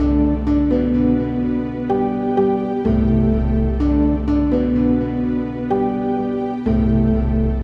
A looped melody made within Numerology